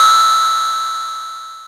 The Future Retro 777 is an analog bassline machine with a nice integrated sequencer. It has flexible routing possibilities and two oscillators, so it is also possible to experiment and create some drum sounds. Here are some.
analog, fr-777, futureretro, hihat, oh, open